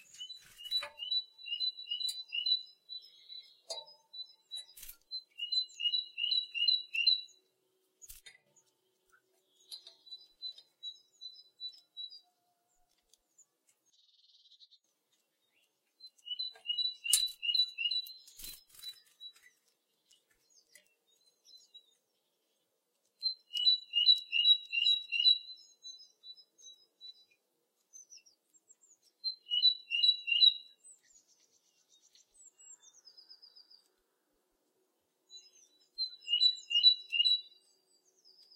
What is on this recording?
A Great Tit are singing in the winter around the bird feeding.
Mikrophones 2 OM1(line-audio)
Wind protect Röde WS8